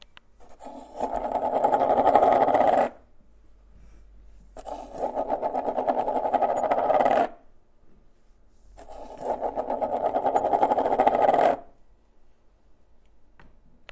mySound Sint-Laurens Belgium Blikje
Sounds from objects that are beloved to the participant pupils at the Sint-Laurens school, Sint-Kruis-Winkel, Belgium. The source of the sounds has to be guessed.
Belgium, Blikje, Sint-Kruis-Winkel